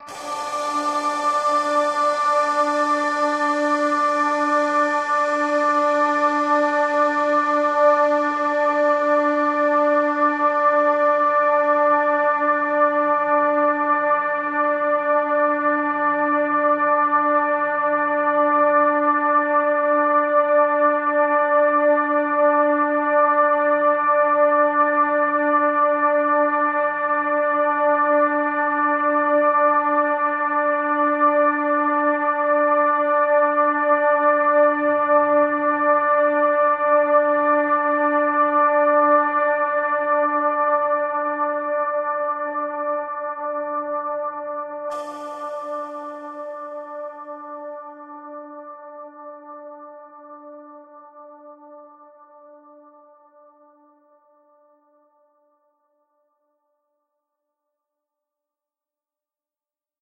Layers 13 - FRYDAY-62
LAYERS 013 - FRYDAY is an extensive multisample package containing 128 samples. The numbers are equivalent to chromatic key assignment covering a complete MIDI keyboard (128 keys). The sound of FRYDAY is one of a beautiful PAD. Each sample is one minute long and has a noisy attack sound that fades away quite quickly. After that remains a long sustain phase. It was created using NI Kontakt 4 and the lovely Discovery Pro synth (a virtual Nordlead) within Cubase 5 and a lot of convolution (Voxengo's Pristine Space is my favourite) as well as some reverb from u-he: Uhbik-A.
ambient, multisample, organ, pad, soundscape, space